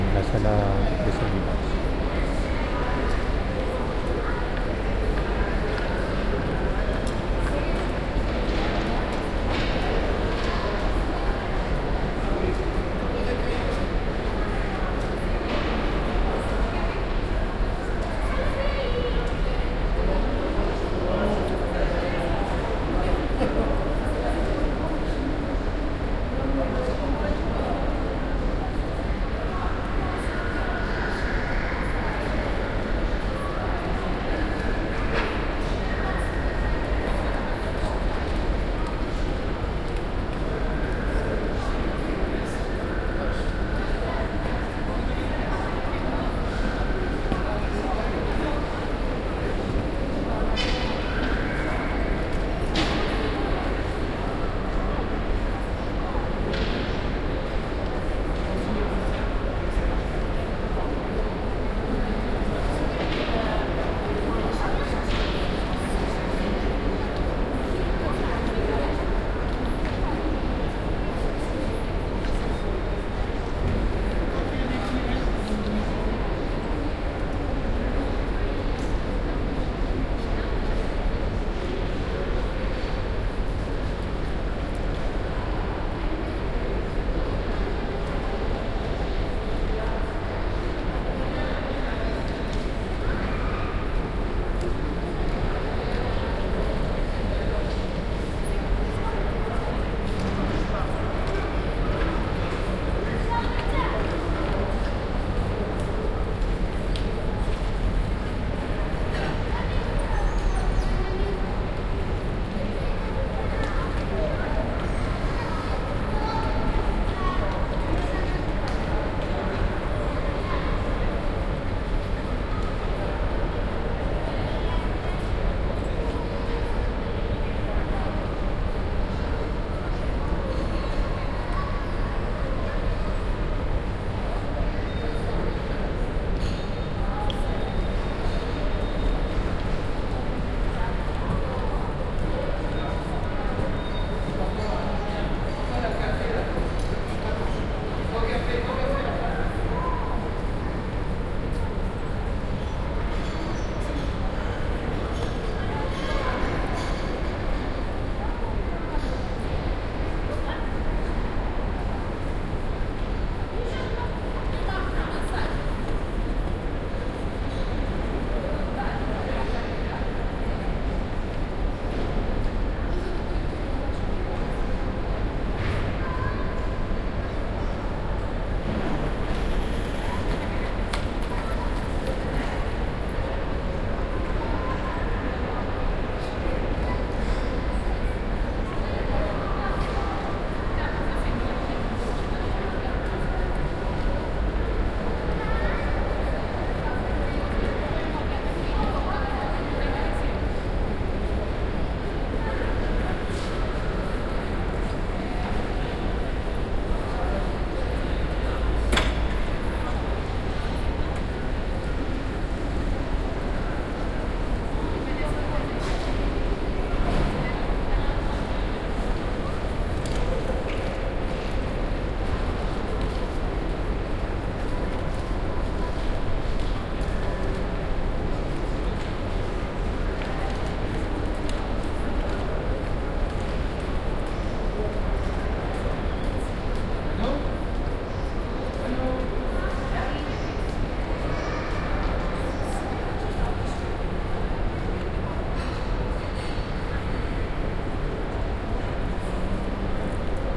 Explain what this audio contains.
Porto airport arrival hall
Aiport ambience at the arrival terminal of Porto airport. Indistinct voices and chatter.
airport, binaural, crowd, departures, field-recording, hall, Oporto, people, Porto, reverberation, voices